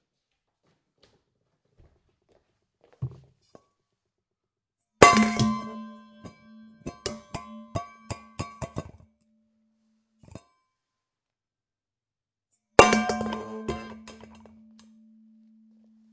metallic can impact
can falling on the floor
can, fall, impact, kitchen